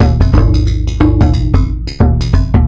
Done with Redrum in Reason
electronic, percussion, reason, redrum, wavedrum